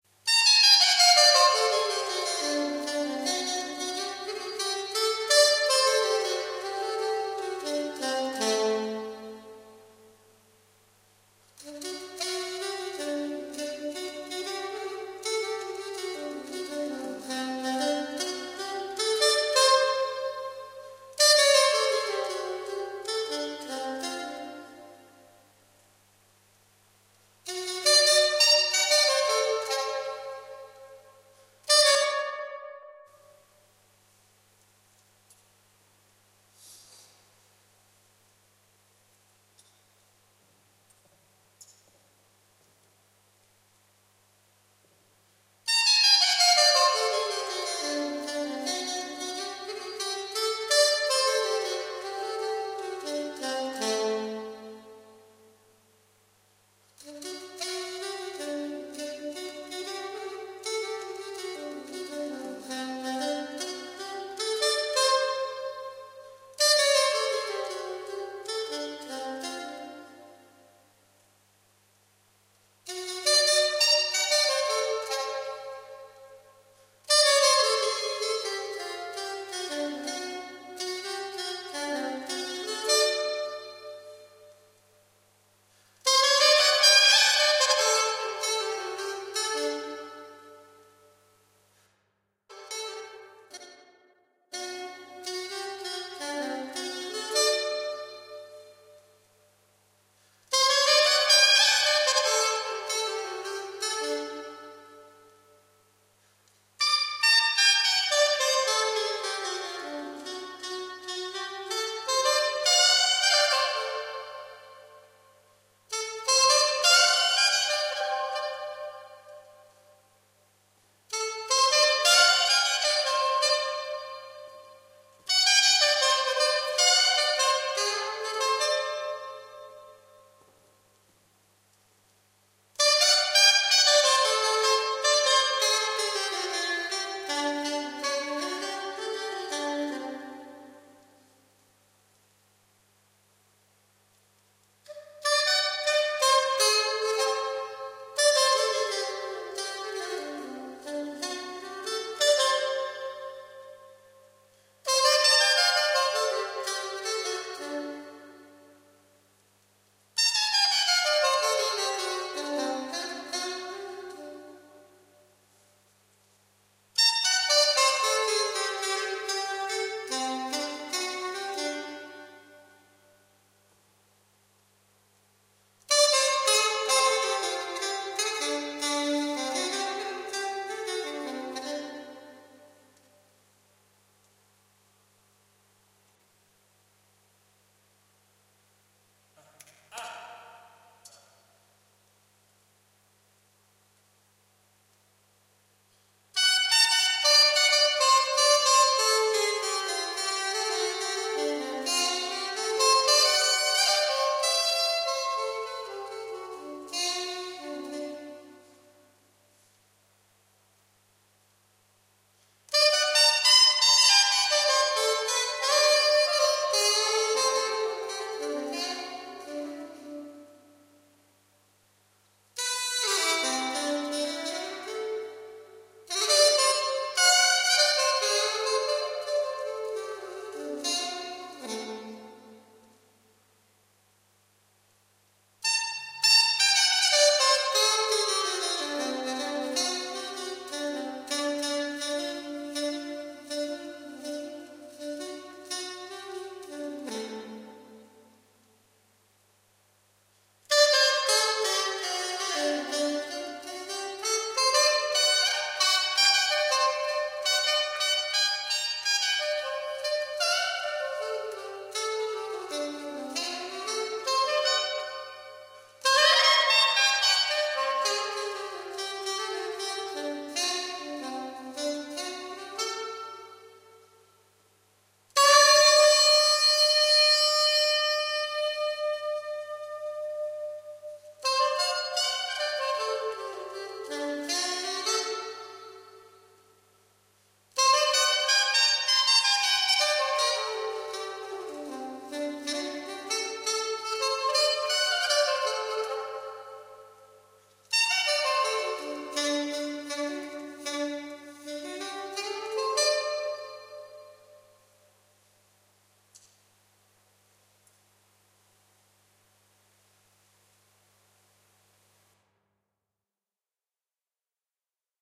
sop sax solo (reverb)
Well, for the benefit of Mr Kite, here is a sample clip of audio of myself playing the soprano sax. I was a semi-pro player for many years and always kept an archive of audio clips. This time with a lot of reverb. Enjoy.